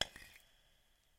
Sound of rocks or stones grating against each other, with reverb, as the sound someone walking in a cave might make with their footsteps.
cave, rocks